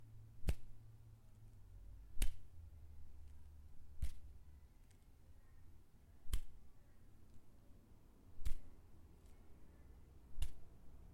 Recorded with an H4n, punch hitting soft material.